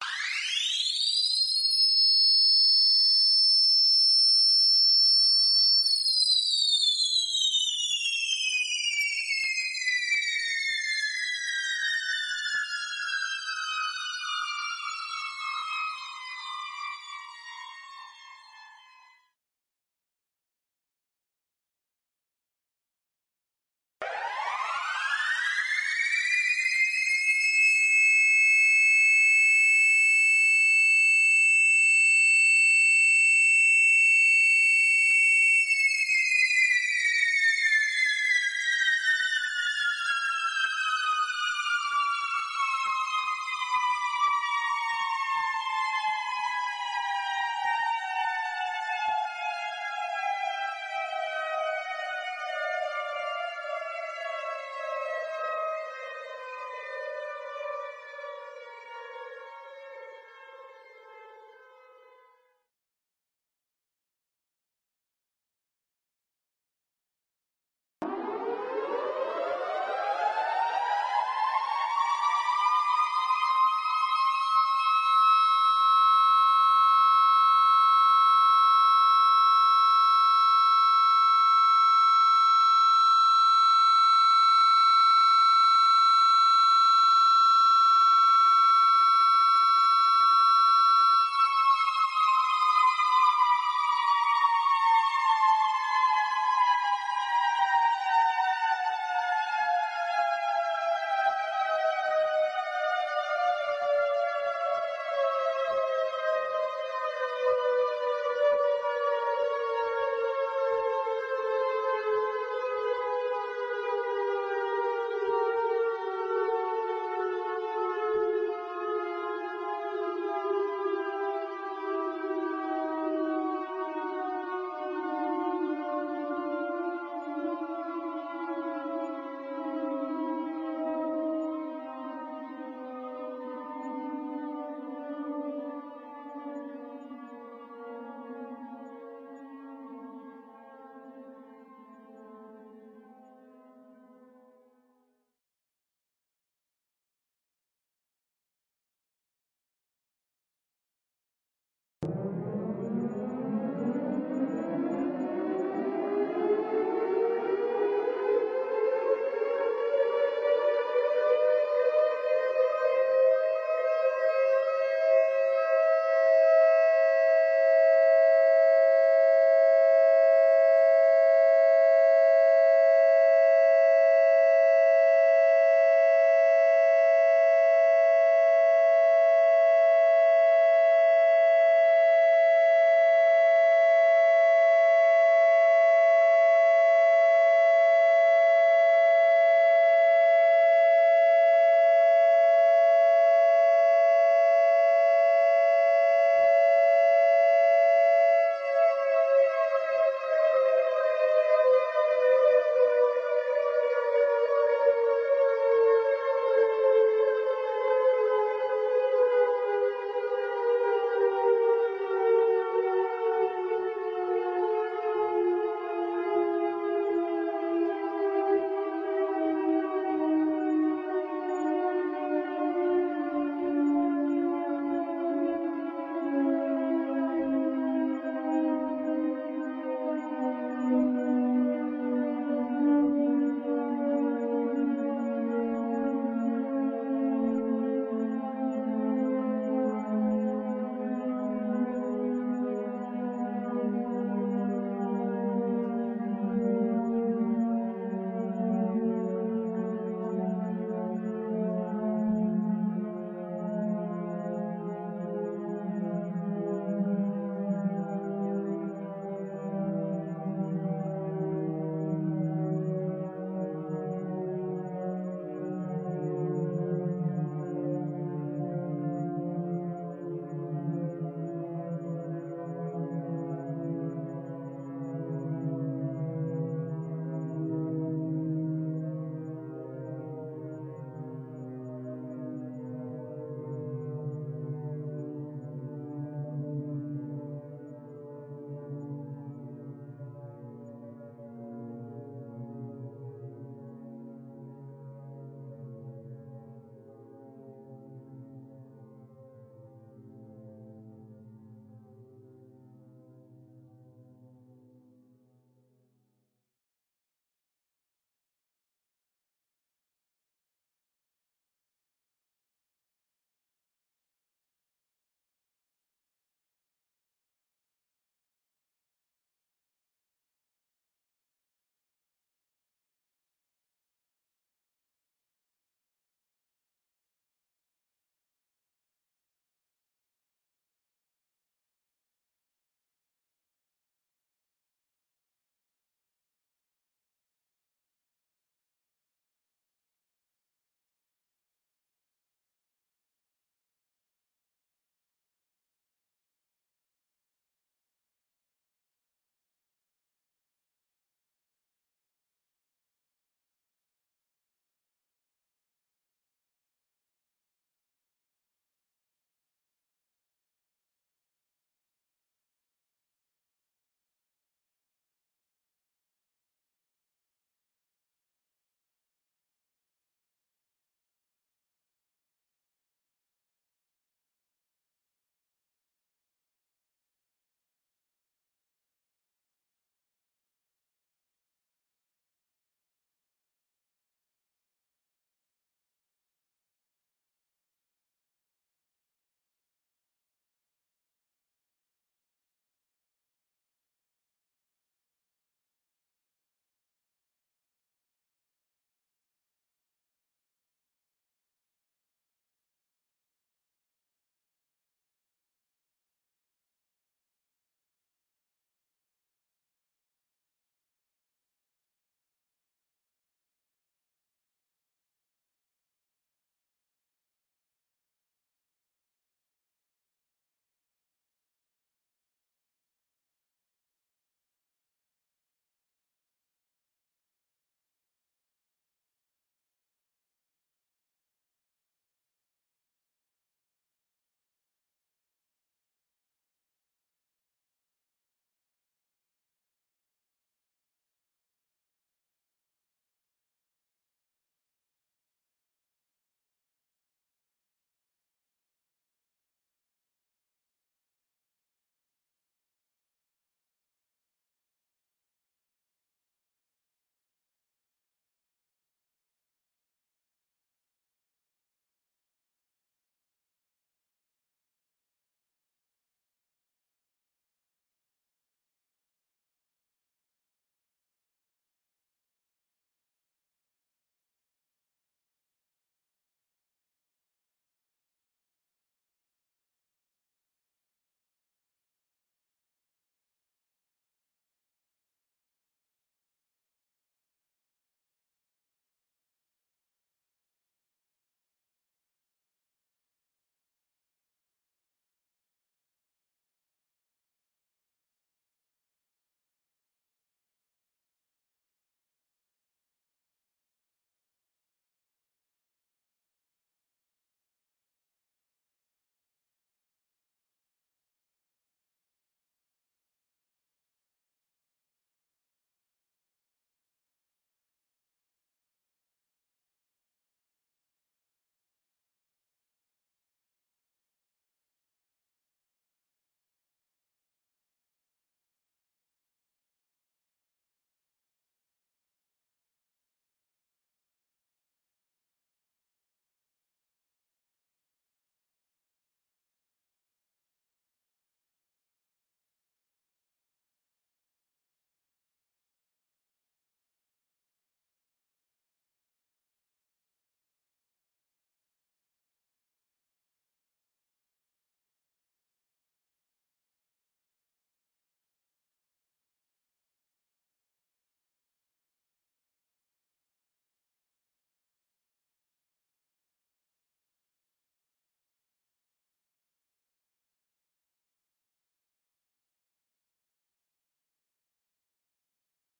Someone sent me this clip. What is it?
User name fmagrao's sound 80761__fmagrao__siren was stretched, put in a sampler and played as described but of varying duration and it would appear that there is an optimum frequency range for these sirens. I will create a lower frequency version in due course and a foghorn/siren hybrid.